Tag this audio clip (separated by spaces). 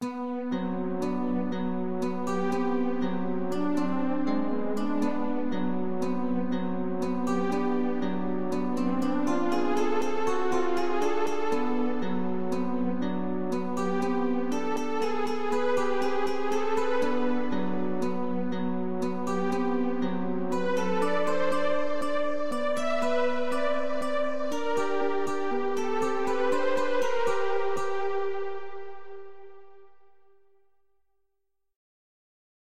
Melody Strained Nervous Scary Tense Streched Taut